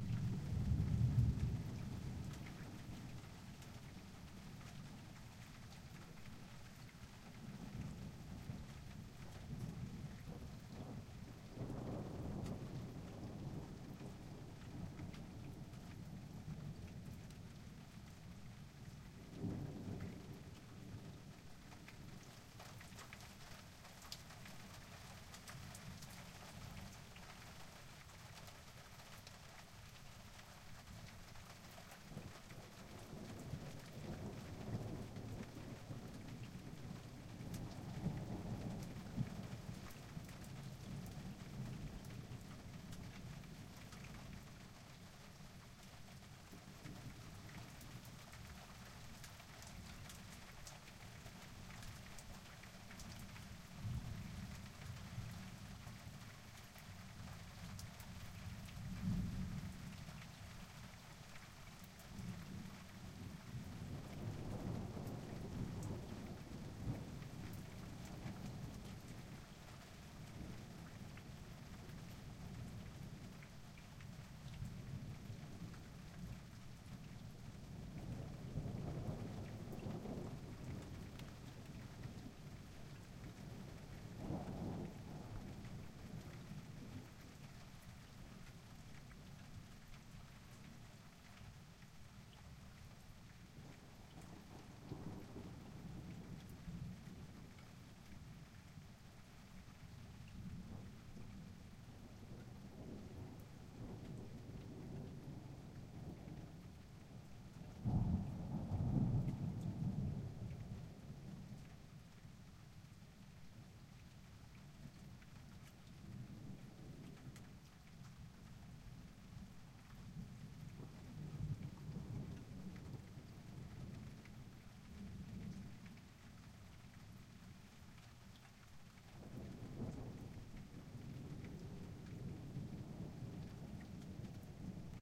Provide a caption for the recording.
Some soft rain and quite calm thunder.
Recorded with a Zoom H2.